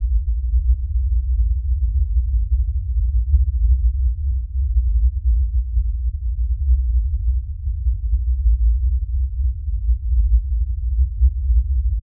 Coagula Science! 14 - LoRumble
Low rumble as a background noise.
Made in Coagula.
low, quake, cinematic, noise, deep, bass, rumble, background, shaking, earthquake